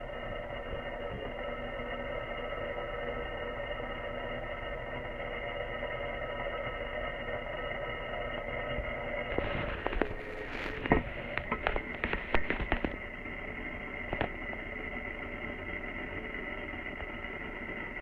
Contact mic recording